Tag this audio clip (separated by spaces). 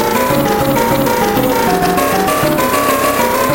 machine machinery science-fiction robot artificial electromechanics sci-fi industrial robotics electronics fantasy mechanical